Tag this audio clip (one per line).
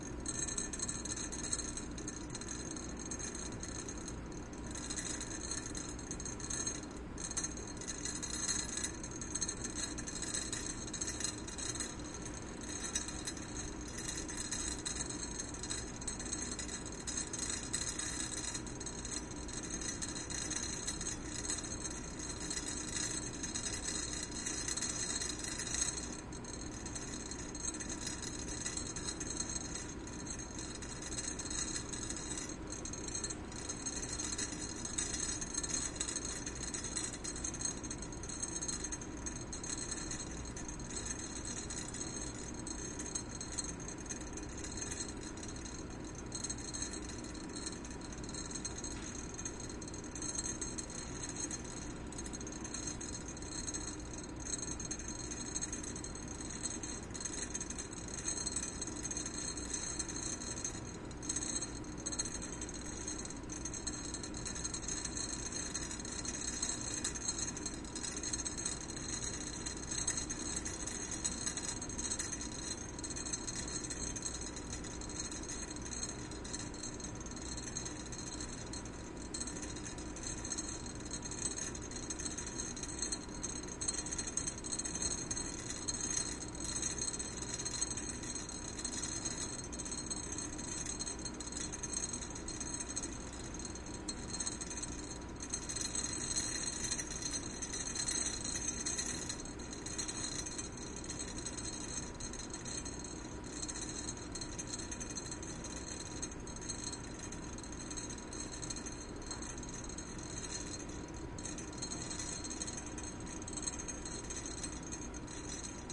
clanking
metal
metallic